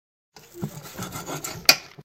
knife-chop
Sound of a knife cutting through bread